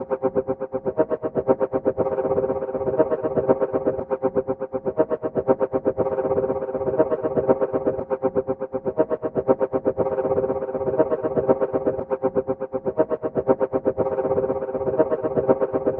ZEF-rastathing 120bpm
A sweet dubby melody, very mental, at 120 bpm on Reaktor.
ambiant, dub, experimental, loop, melody, rythm